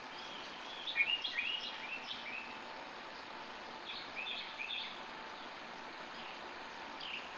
various birds singing an hour after sunrise. recorded on a digital IC recorder. filtered to clean background noise using Cool Edit.